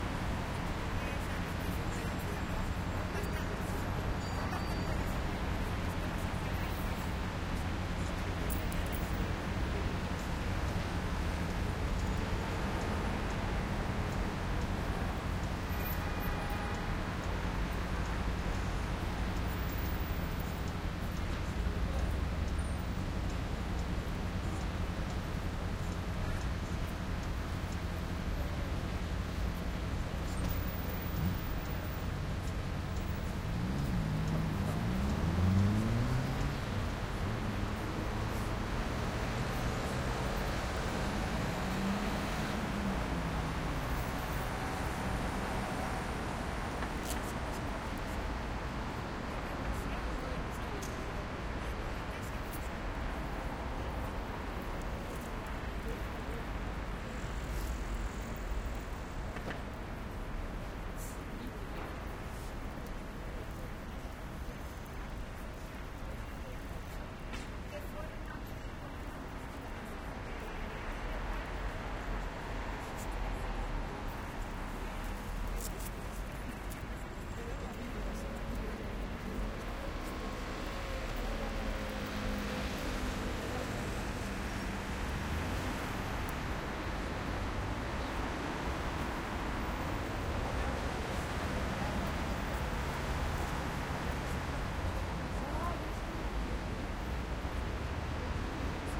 Intercambiador Plaza Castilla
Madrid Plaza Castilla recording, transport hub, close sound perspective. Medium traffic, close to the square, medium velocity cars, siren, motorcycle, brakes, medium noise pedestrians.
Recorded with a Soundfield ST450 in a Sound Devices 744T
ambiance, ambience, ambient, Ambisonic, atmo, atmosphere, B-Format, cars, city, field-recording, general-noise, Madrid, noise, people, Plaza-Castilla, Soundfield, Square, ST450, town, traffic